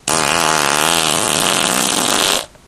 best long fart
explosion, fart, flatulation, flatulence, gas, poot